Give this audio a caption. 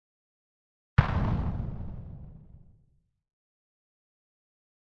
Synthesized Explosion 01
Synthesized using a Korg microKorg
dynamite, explode, explosion, grenade